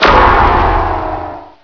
Power Failure
Futuristic